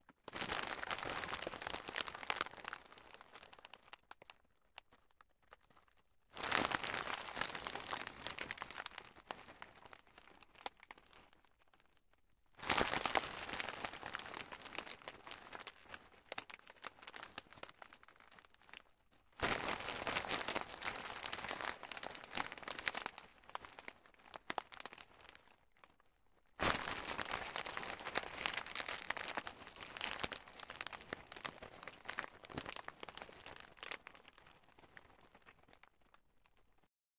Thunder (Chips Bag)
The sound of handling a chips bag in a certain manner can create the basis for what seems to evoke the sound of thunder, when tampered with through equalization.
Lighting, OWI, Bag, Strike